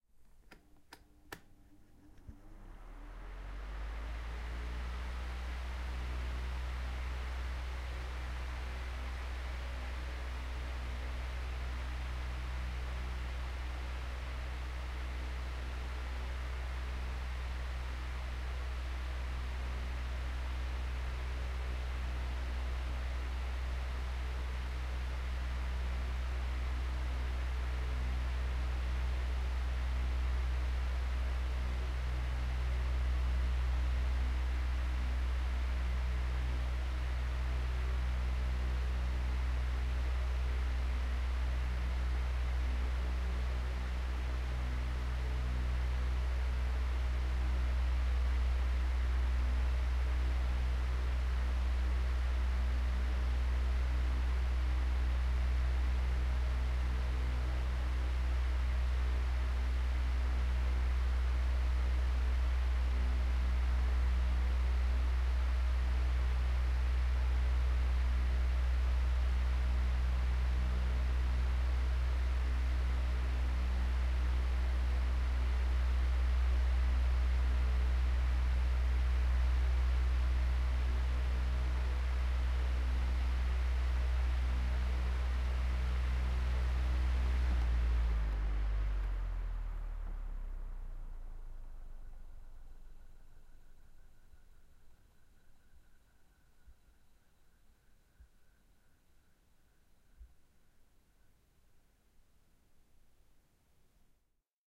A fan blowing, set to the highest setting. There's a strong low end presence, interestingly. The recording was made from behind the fan so the air wouldn't blow into the mic directly.